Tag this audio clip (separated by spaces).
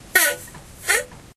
poot flatulence flatulation gas fart